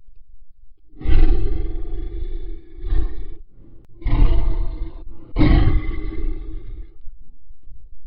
Some short growls used in my Zod2 recording.
beasts, creatures, growl, horror, monster, scary